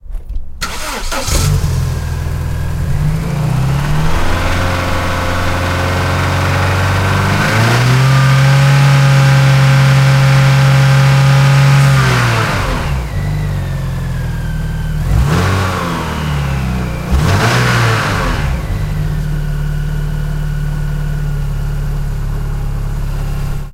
Car - Start and Revving
Recording of a car starting, revving and idling
Vauxhall Omega 2.2